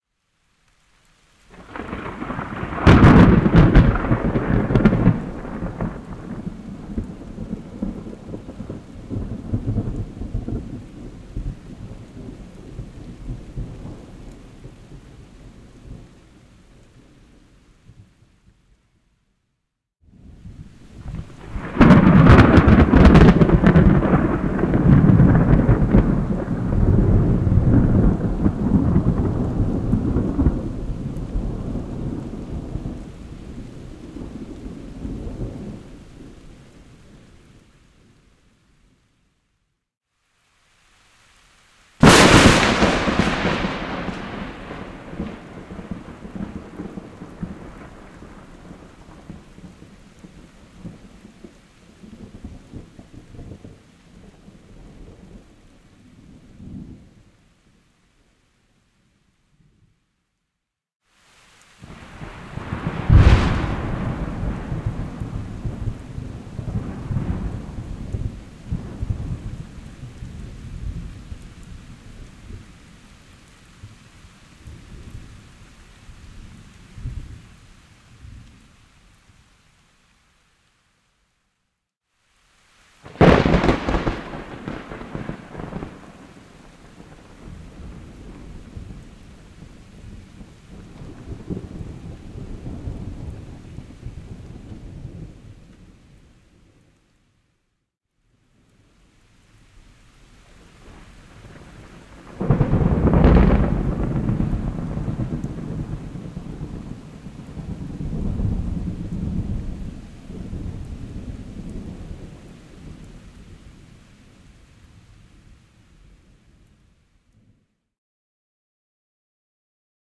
*Warning - This file contains high amplitude sounds - Please make sure playback volume is at a minimum before listening*
Samples of dramatic thunder crashes from a summer storm field recording.